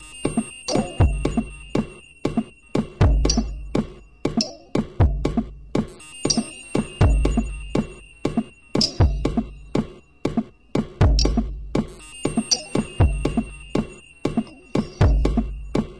this file is made from sounds from toys of my son.
abstract, drum, industrial, natural, electronic, loop, beats